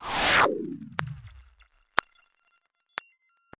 Attack Zound-196
A noise sweep followed by some strange artifacts. A variation on "Attack Zound-193". This sound was created using the Waldorf Attack VSTi within Cubase SX.